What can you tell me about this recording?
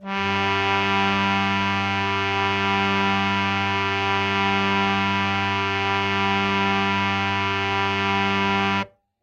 "Samples of all keys and drones separately from a harmonium. Recorded in the Euterpea Studio at Yale University's Department of Computer Science. Some equalization applied after recording."
harmonium, individual, instrument, key, note, organ, reed, sample, sample-pack, single-note